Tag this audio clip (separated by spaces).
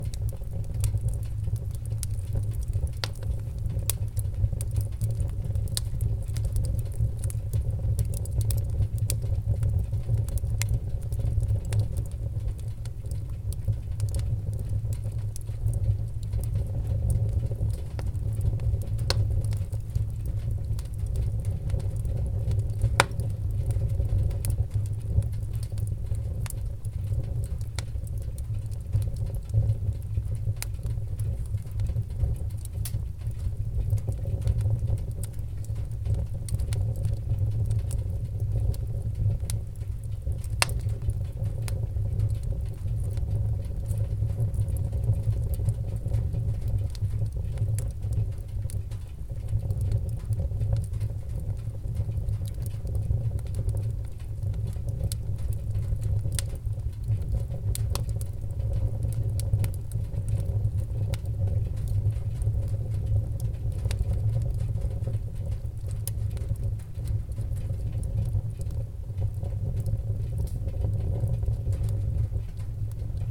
wood-stove hot stove flames oven logs heat rumble fireplace fire flame